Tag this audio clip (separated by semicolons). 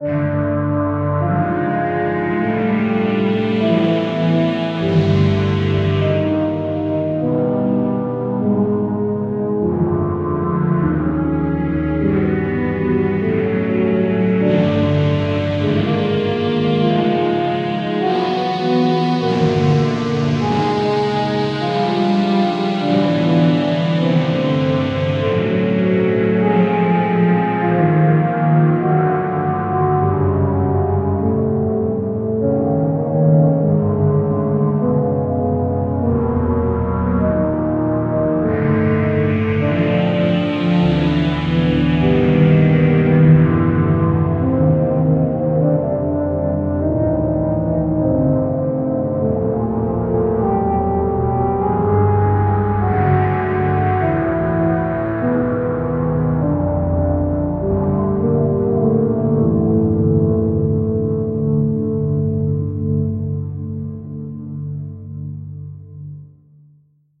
cartoon; creepy; fear; funny; game; ghost; goofy; halloween; haunted; horror; monster; organ; phantom; pumpkin; scary; score; silly; sinister; slow; soundtrack; spooky; suspense; thrill; vampire